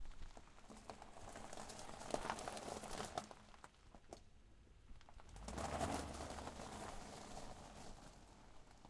A Honda Accord drives on gravel - pulls up, stops, accelerates